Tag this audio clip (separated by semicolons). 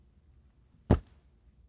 space; punch; spacesuit